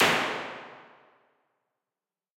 Large Bright Plate 01
Impulse response of a large German made analog plate reverb. Pre-EQ was used to brighten up an unusually dark sounding model of this classic 1950's plate. There are 5 of this color in the pack, with incremental damper settings.
IR, Impulse, Reverb, Plate